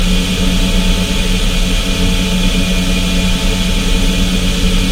Created using spectral freezing max patch. Some may have pops and clicks or audible looping but shouldn't be hard to fix.
Atmospheric, Background, Everlasting, Freeze, Perpetual, Sound-Effect, Soundscape, Still